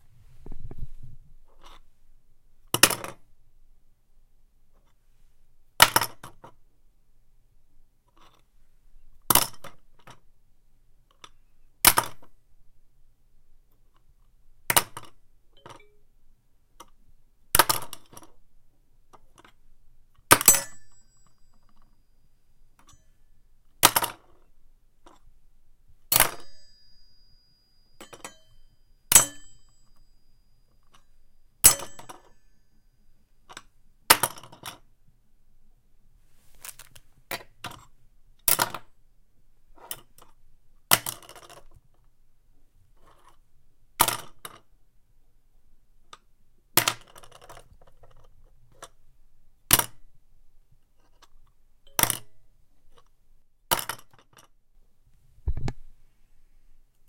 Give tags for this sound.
wood
impact
thump
falls

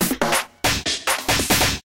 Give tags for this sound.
mix
new
vexst

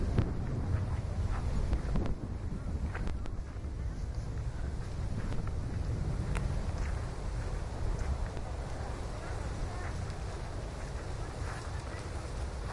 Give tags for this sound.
Deltasona
Llobregat
vent
viento
wind

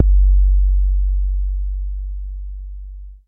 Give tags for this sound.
subtractive
roland
sh-1
boom
bd
single
synthetic
hit
percussion
bassdrum
analog
drum

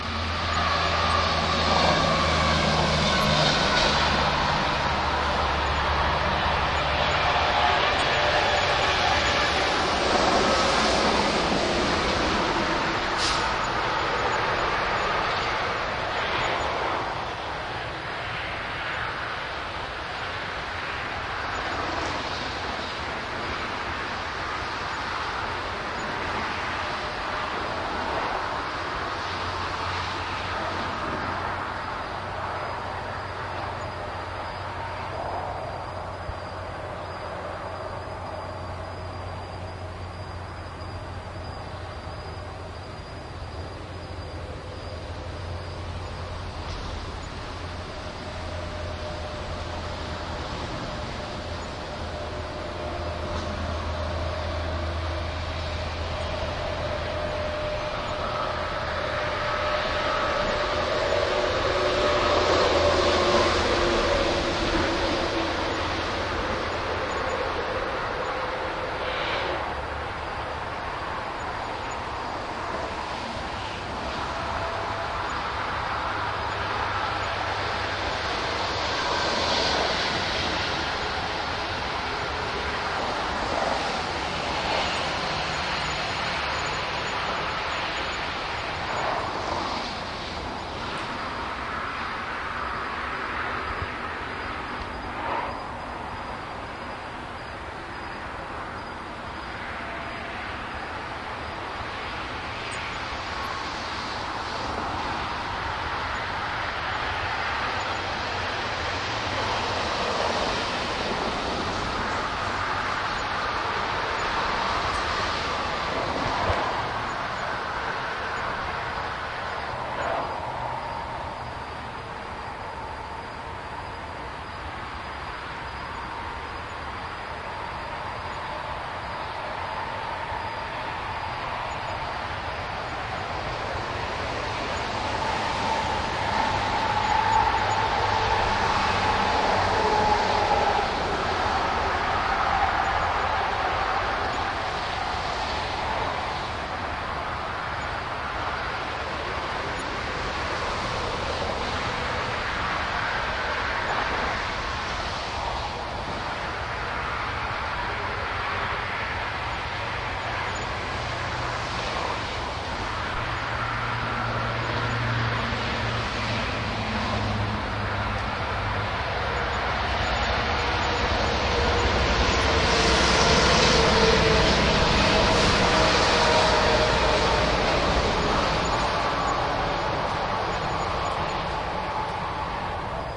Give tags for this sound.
field-recording
road

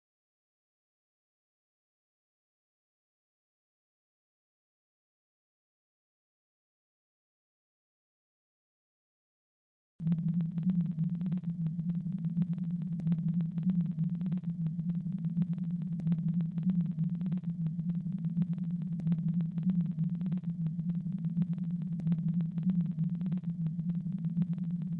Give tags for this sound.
accordio
breath
horror
processed

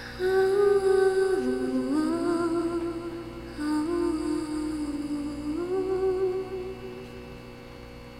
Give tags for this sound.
soft
short
girl

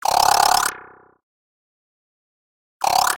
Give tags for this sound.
computer
digital
electric
freaky
future
fx
fxs
lo-fi
robotic
sound-design
sound-effect

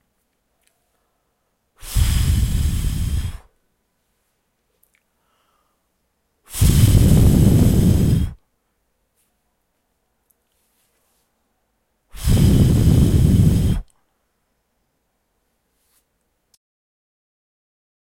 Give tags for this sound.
air
explosion
wind

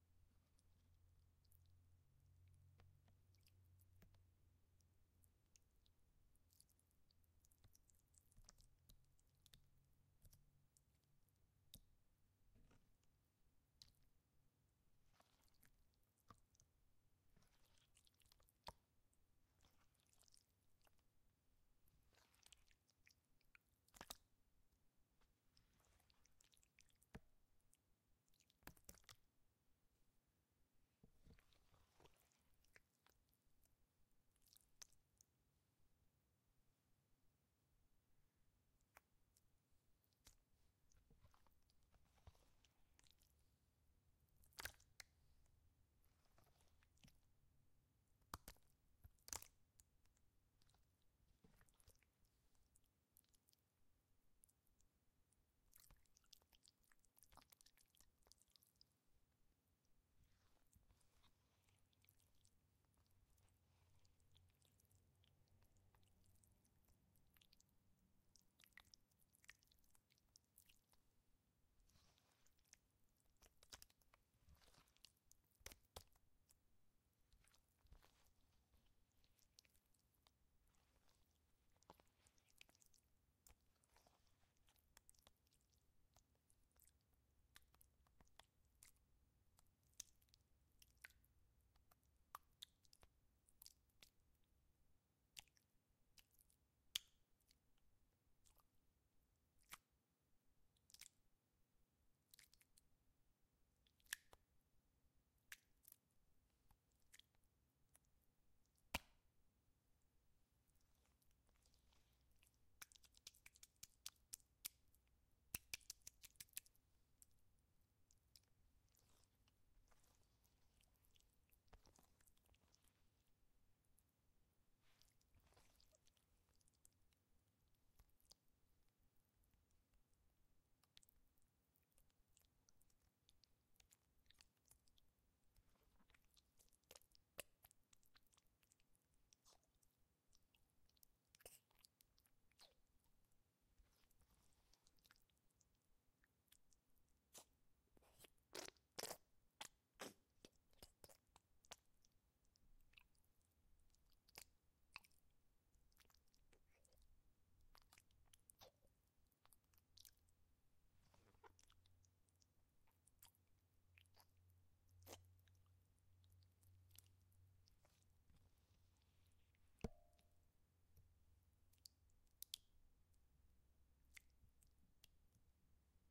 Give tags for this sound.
jello
ambrosia
gelatin
slime
jelly
slurp
pd
gel
goetterspeise
pudding
jell-o
gelatine

